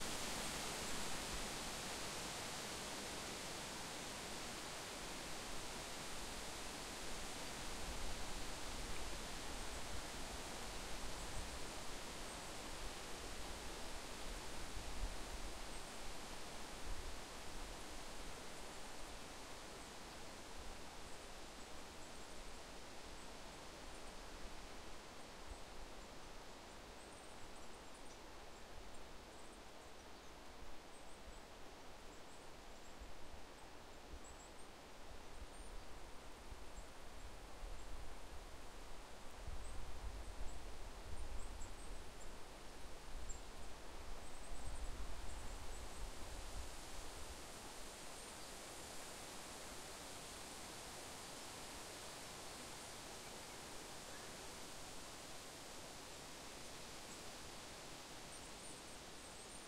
Early autumn forest. Noise. Wind in the trees. Birds.
Recorded: 2013-09-15.
XY-stereo.
Recorder: Tascam DR-40
nature, ambiance, forest, field-recording, noise, atmosphere, soundscape, ambience, trees, ambient, wind